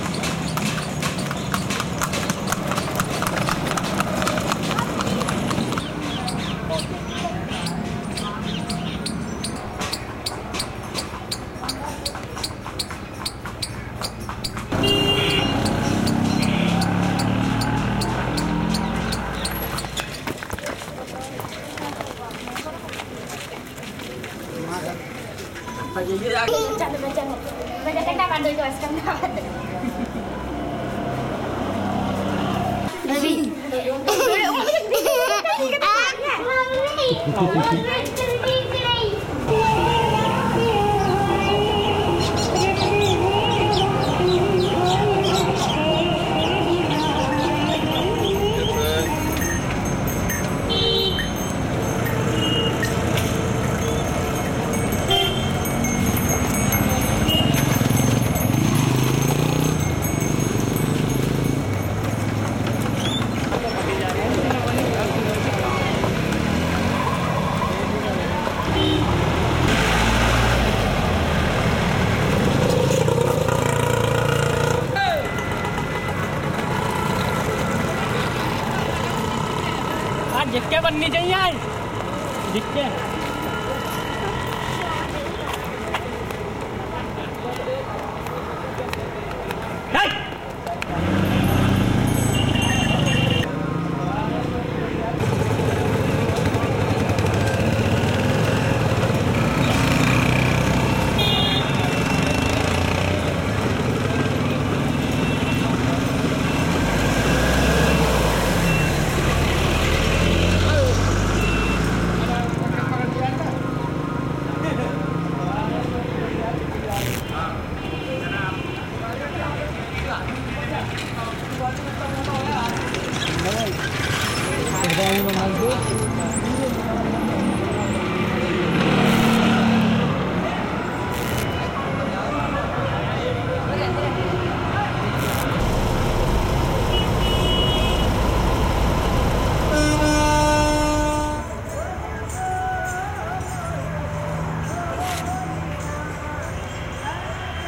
India, streets of Agra city. The recording was done a few hundred metres away from the Taj Mahal where the usual traffic is prohibited. You hear horses, children, unusually low traffic and pedestrians.